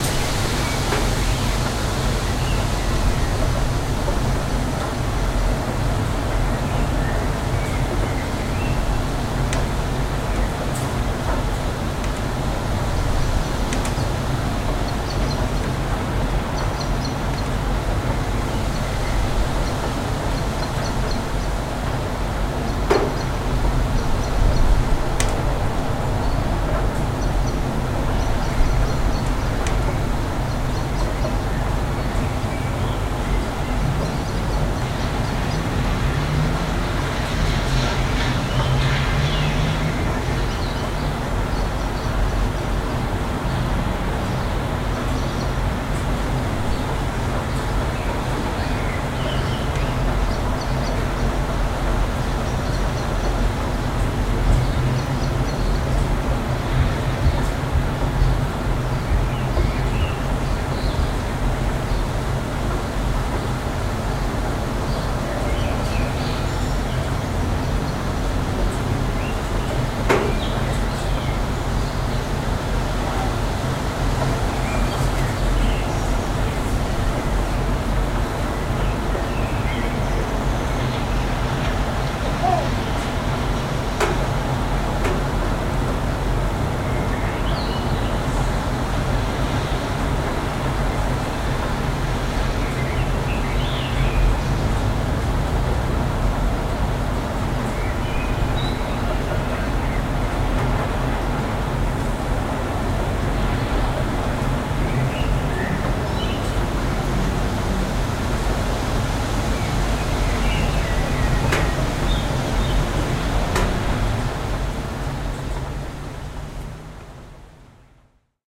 Recording by my Neumann TLM102 inside room, near opened window